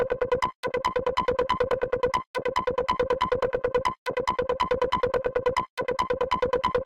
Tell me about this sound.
Electronic Beat 140 BPM
Here's a quick electronic beat I made in pro tools. I created it using the vacuum plugin. The beat is in 4/4 and has a BPM of 140.
I haven't edited the sound in anyway outside of vacuum i.e. no EQ or compression
140-Bpm, 4-Bars, techno